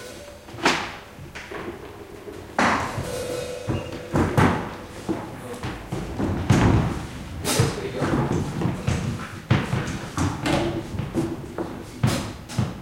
Rehersal Noise 2
The gaps between playing - voices and random sounds at writing sessions, May 2006. Recorded using Sony MZ0-R90 Portable Minidisc Recorder and Sony ECM-MS907 stereo mic.